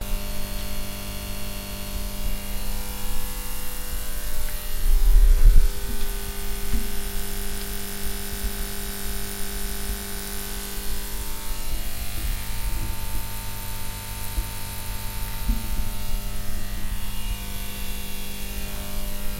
Sound of a neon.
neon, electric, city, electricity